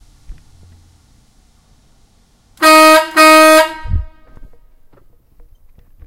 air horn close and loud
up close air horn of truck
loud, truckair-horn, close